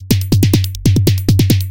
Rhythmmaker Randomized 140 bpm loop -45
A pure electro loop consisting of kick and hihats plus snare. This loop is part of the "Rhythmmaker Randomized 140 bpm
loops pack" sample pack. They were all created with the Rhythmmaker
ensemble, part of the Electronic Instruments Vol. 1, within Reaktor. Tempo is 140 bpm
and duration 1 bar in 4/4. The measure division is sometimes different
from the the straight four on the floor and quite experimental.
Exported as a loop within Cubase SX and mastering done within Wavelab using several plugins (EQ, Stereo Enhancer, multiband compressor, limiter).
140-bpm, drumloop, loop, electro